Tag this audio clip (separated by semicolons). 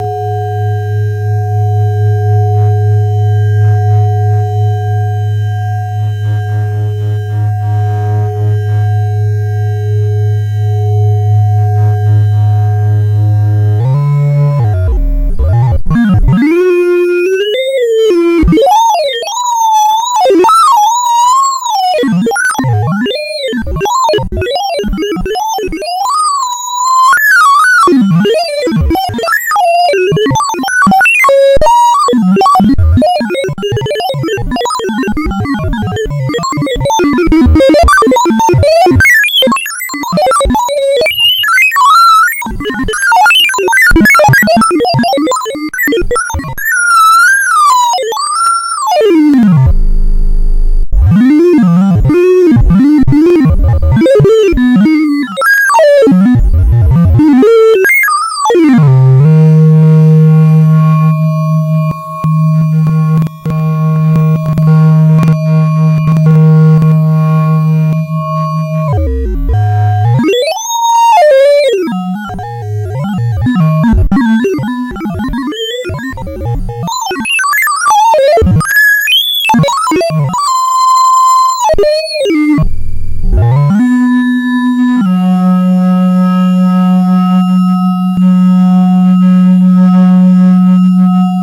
synth; synthesizer; synthetic; digital; sliced; synthesis